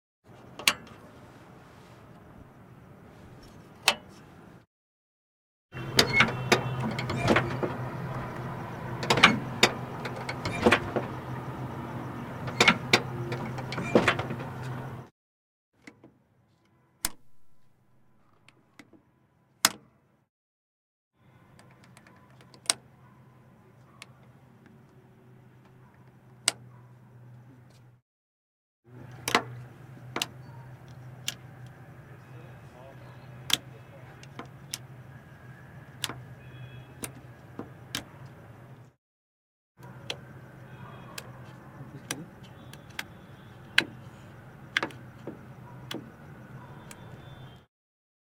Auto Rickshaw - Clicks, Creaks, and Noises
Bajaj Auto Rickshaw, Recorded on Tascam DR-100mk2, recorded by FVC students as a part of NID Sound Design workshop.
Rick, Autorickshaw, Richshaw, Auto, India, Ric, Tuk